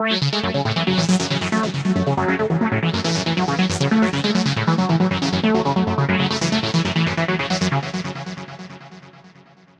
Psy Trance Loop - 138 Bpm 001
PsyTrance Loop psy goatrance goa
psy, goatrance, goa, Loop, PsyTrance